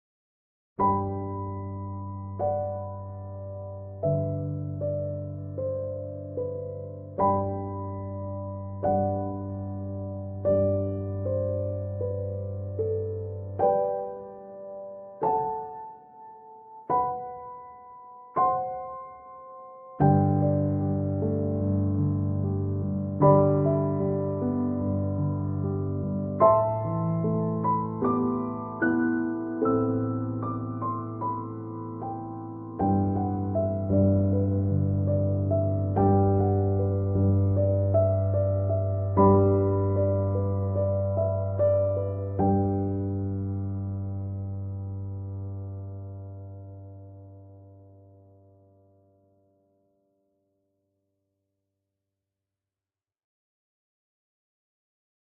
Messy Love Piano in G Major
cinematic, g, love, major, music, piano, soundtrack